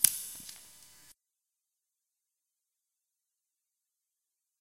scissors rev
reverbed sound of scissors' cut
hi-pitch, reverb, scissors, space